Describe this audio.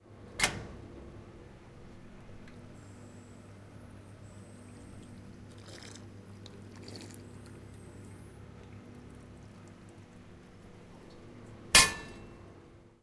water fountain
This represent a sound caused by a young man pressing a footswitch to drink some water from a fountain and then take out his foot.
Ambience sound and sound of action is perceived.
drink, campus-upf, fountain, water, switch